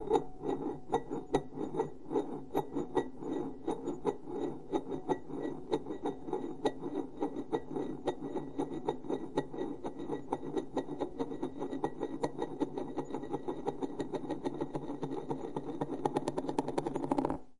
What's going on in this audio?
metal bowl - spinning - right side up 04
Spinning a metal bowl on a laminate counter top, with the bowl facing right-side up.
bowl, counter-top, dish